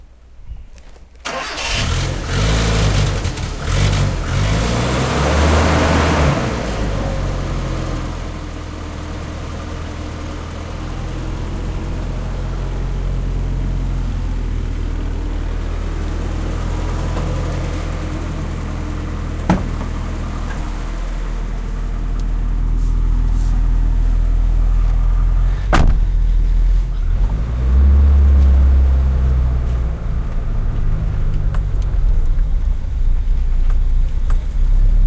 start engine
Starting engine of a
Mitsubishi Montero, model 97
start, engine, car